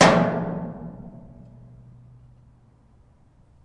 One of a pack of sounds, recorded in an abandoned industrial complex.
Recorded with a Zoom H2.
city, clean, field-recording, high-quality, industrial, metal, metallic, percussion, percussive, urban